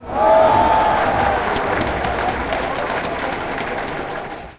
nagoya-baseballregion 01
Nagoya Dome 14.07.2013, baseball match Dragons vs Giants. Recorded with internal mics of a Sony PCM-M10
Baseball, Soundscape, Crowd, Ambient